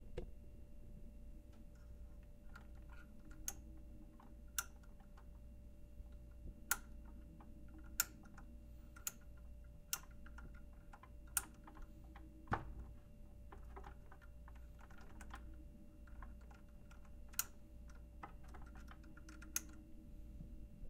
Lamp shade switch
Banging around with a lamp
lamp, field-recording, H6